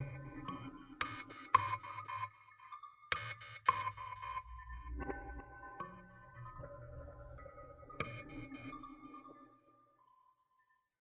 kalimba home made with some delay
ambient, delay, home, instrument, kalimba, made